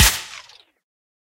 dubstep clap
dubstep, clap, drums, drum